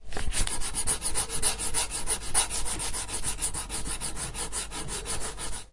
mySound MES Hamas
mySound, Spain, Barcelona, Mediterania